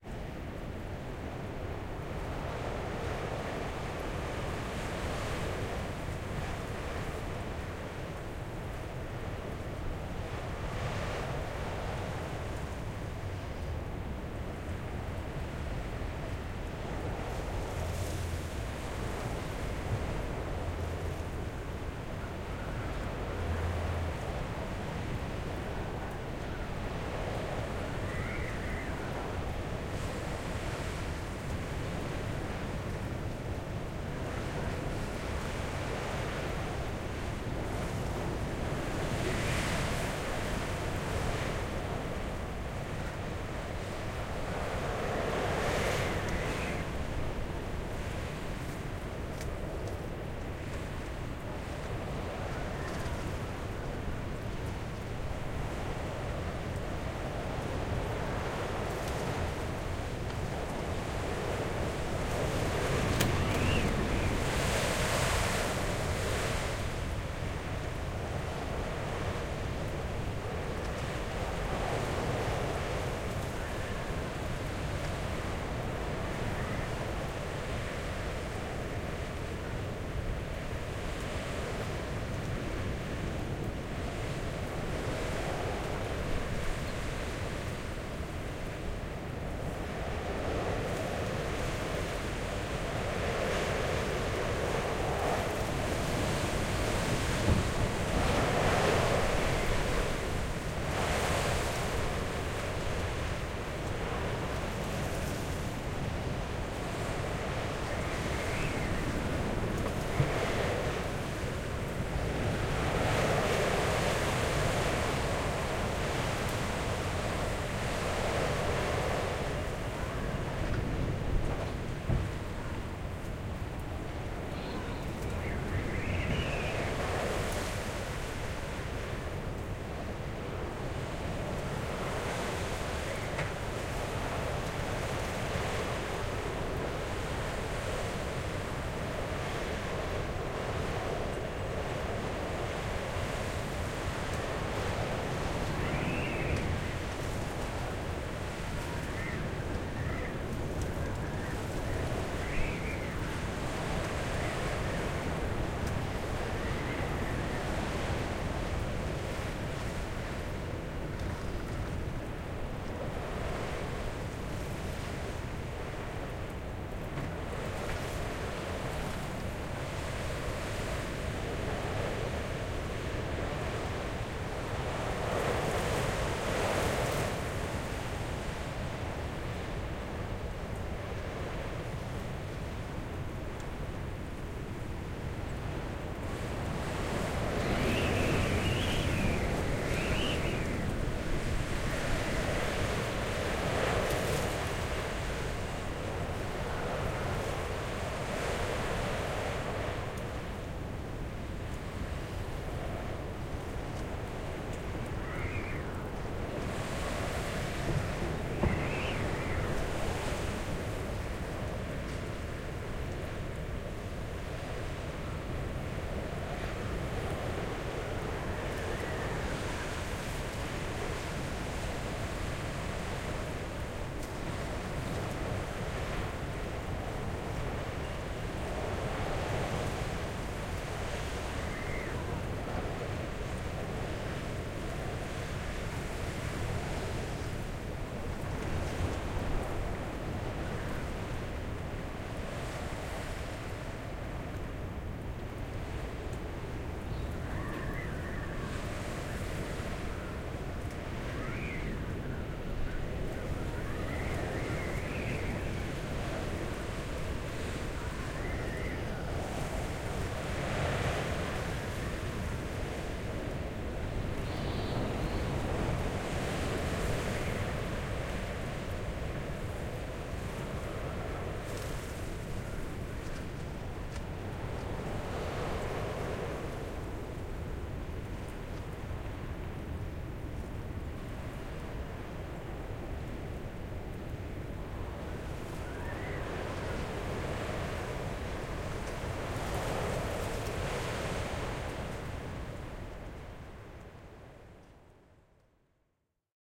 Ambisonics (surround) field recording at Polleide at the Sotra Island west of Bergen, Norway, on Christmas Day 2011 as the hurricane Dagmar is approaching. The epicenter of the hurricane hit the coast further north causing substantial damages.
This file has been uploaded in three versions: 4-channel ambisonic B-format, binaural decoding using KEMAR HRTF, and a regular stereo decoding. This is the binaural version
Equipment: SoundField SPS200, Tascam DR640. Decoding is done using the Harpex plugin.